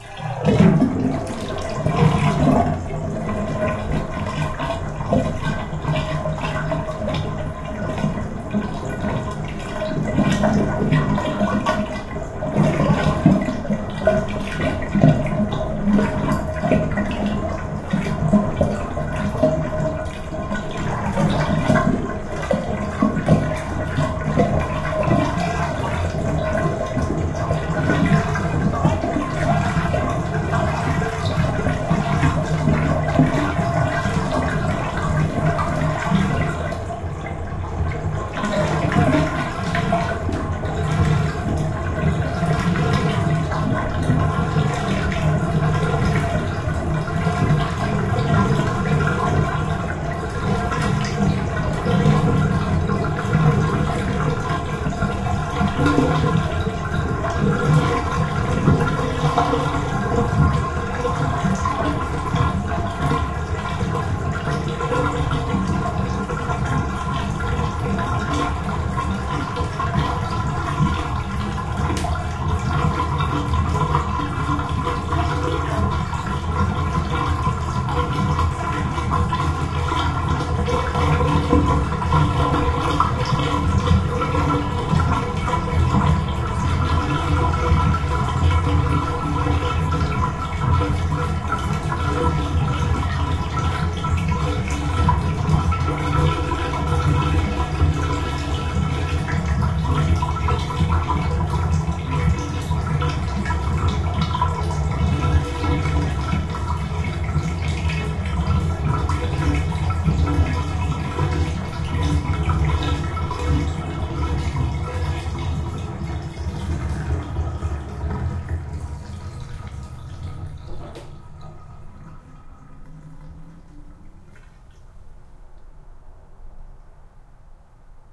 tub drain emptying